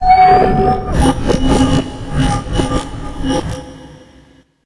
Futuristic organic effect (53)
Futuristic organic effect,sound design elements.
Effects recorded from the field of the ZOOM H6 recorder,and microphone Oktava MK-012-01,and then processed.
Sound composed of several layers, and then processed with different effect plug-ins in: Cakewalk by BandLab, Pro Tools First.
I use software to produce effects:
Ableton Live
VCV RACK 0.6.0
Pro Tools First
glitch, cinematic, abstract, morph, transition, transformer, hit, opening, moves, dark, stinger, destruction, metallic, game, organic, futuristic, drone, impact, woosh, transformation, noise